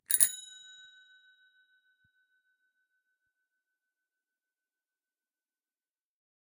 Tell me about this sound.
Bike bell 13
Bicycle bell recorded with an Oktava MK 012-01
bell, bicycle, bike, ring